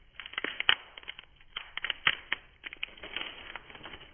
Snapping sticks and branches 12
Snapping sticks and branches
Digital Recorder
branches, break, lumber, snap, snapping, sticks, wood